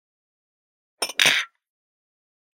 Putting glasses together
glass, glasses, putting